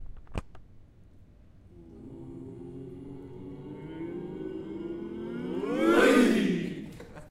Suspense ending in disappointment
Suspense, tension and ending in disappointment sounds. Sound created and recorded by a group of students aged 16 to 18 years old of IES Valldemossa in the context of ESCOLAB activity at Universitat Pompeu Fabra (Barcelona).
Recorded with a Zoom H4 recorder.